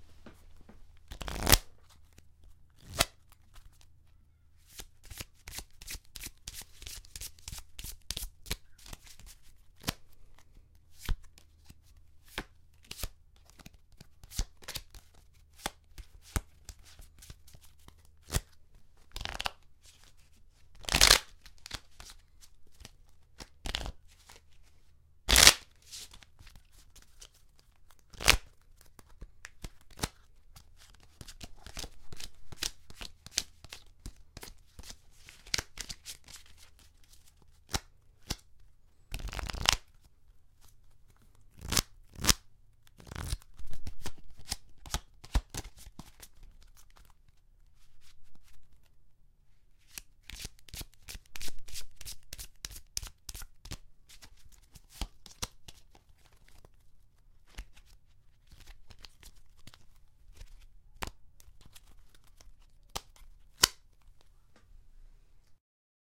playing cards
I couldn't find exactly the "card playing" subtleties I was looking for, so I ended up having to record my own and figured others might find this useful. Contains shuffling, dealing, cutting, and other card "ambiance", so to say.
deal, playing-cards, shuffling